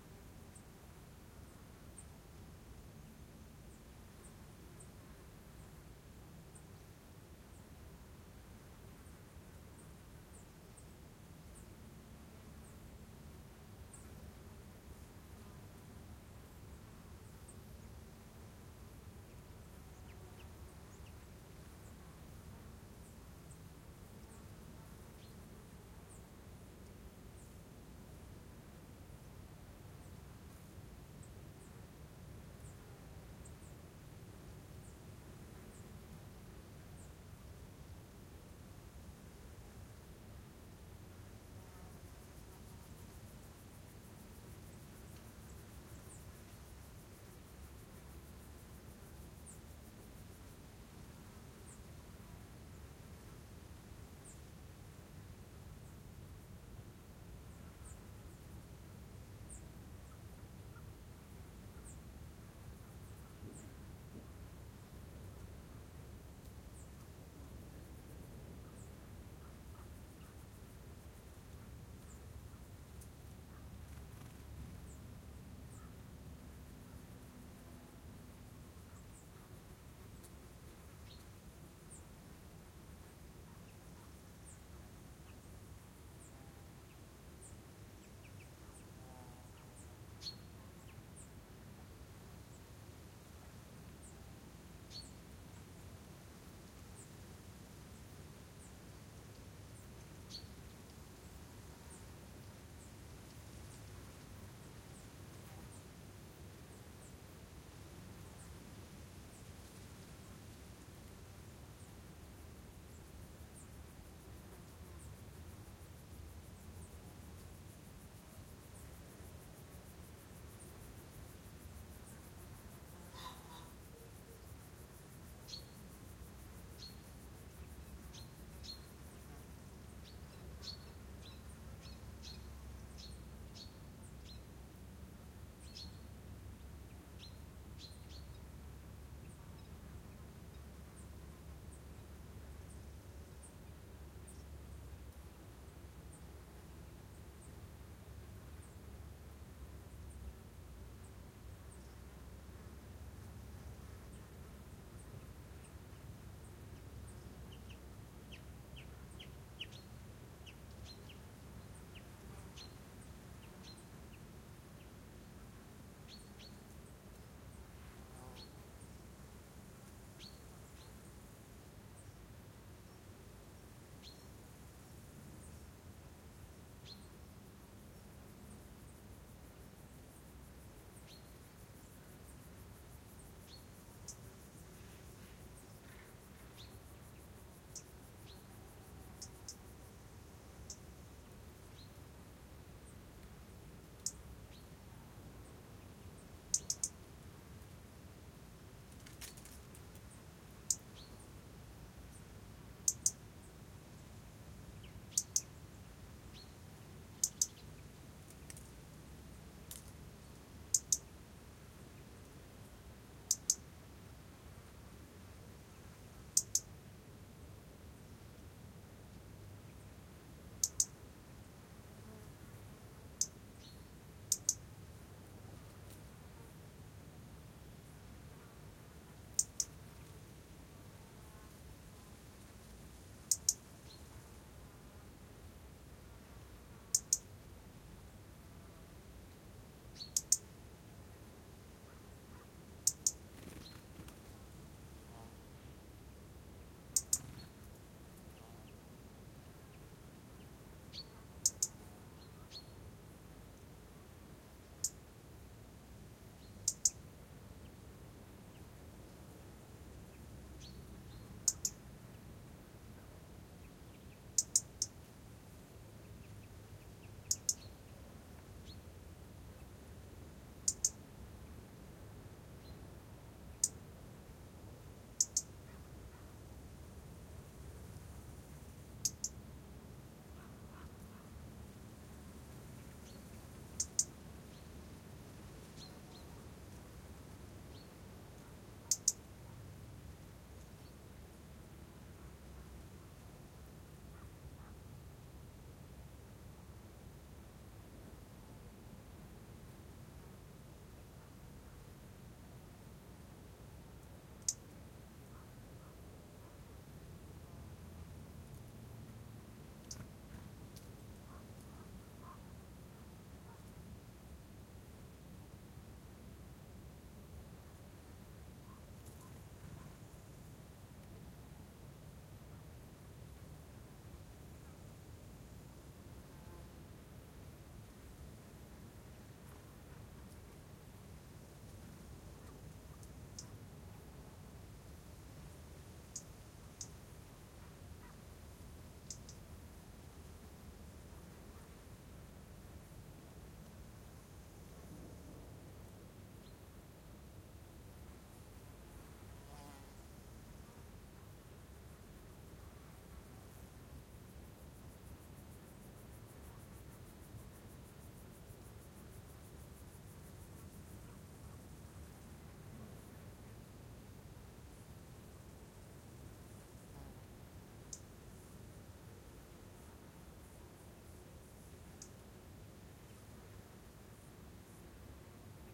meadow with foreground robin
calm meadow, south of France, end of the summer, 6 min.
insects, many flies, some grasshooper, different birs including pheasant (2.03).
A robin is arriving during the recording (3.10) and stays very next to us, on the right hand side, sometimes flitting.
2009
recorded with schoeps AB ORTF
recorded on soundddevice 744T
bird, grasshopper, robin, rural, meadow, insects, France, birds, nature, field-recording